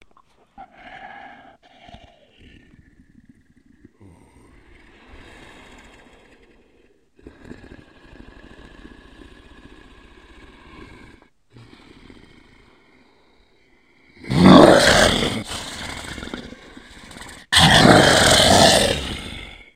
Werewolf Stalks Then Pounces
A Werewolf silently stalks a human while concealed before unleashing a roar. This is all my voice, pitched down 4 semitones to make the roar deeper.